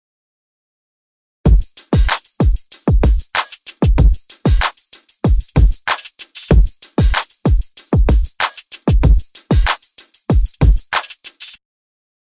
Hip-Hop Beat 9
A cool sounding loop of hip-hop drums. This sound would probably work best in hip-hop songs. This sound was created with Groovepad.
bass, beat, beatbox, dance, deep, drum, drum-kit, drum-loop, drums, funk, funky, groove, groovy, hip-hop, hiphop, loop, loops, low, music, percussion, percussion-loop, rap, rhythm, rythm, song, trap, trap-loop, trip-hop